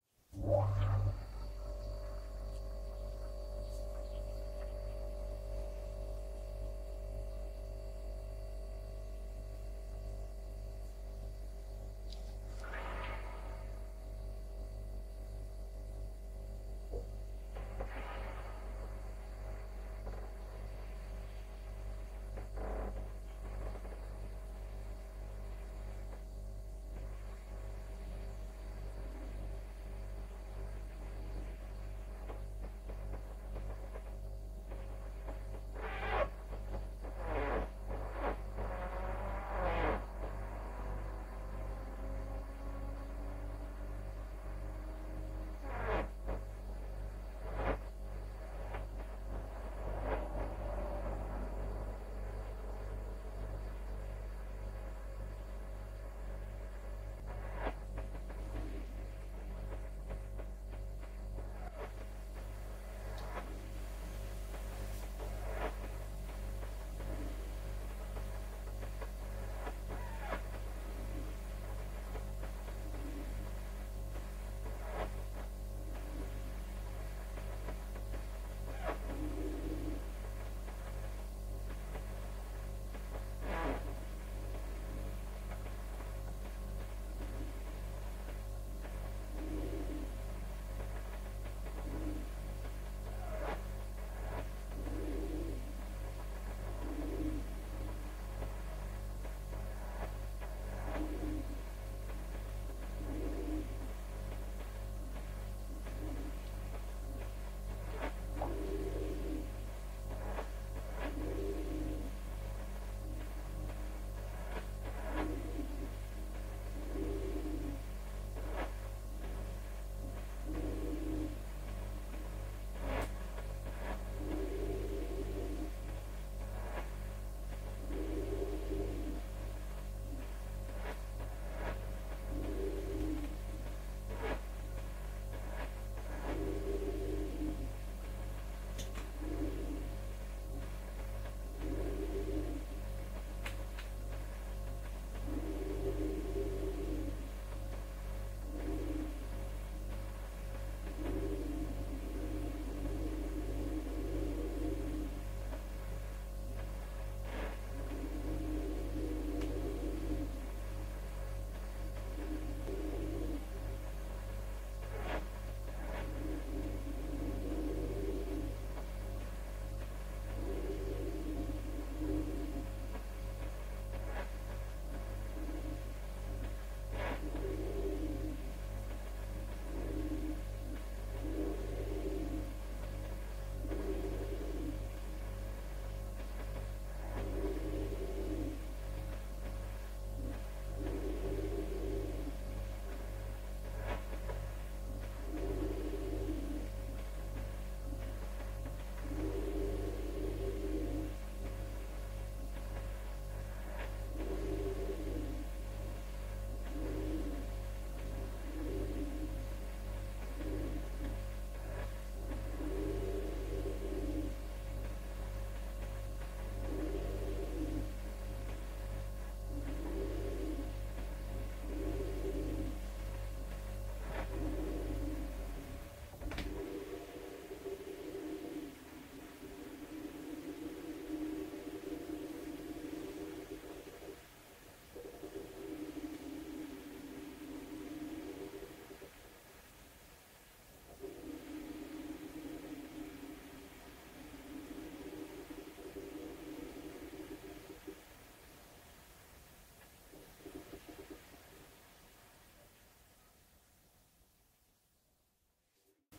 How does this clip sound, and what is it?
The Fridge is cooling down the temperature using a compressor.